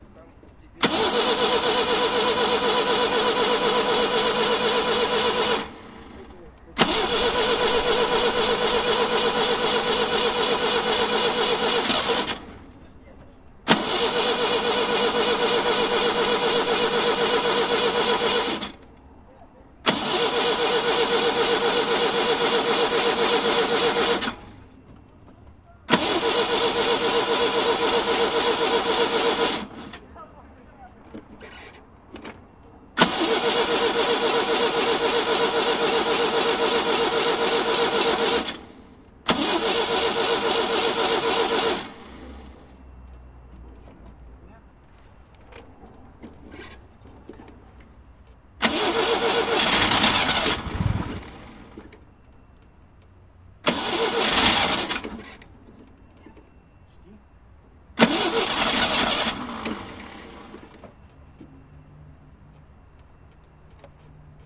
My collegue try to start his old russian car Moskvich-412.
Recorded: 2012-10-25.
car, USSR, 412, vehicle, Moskvich, city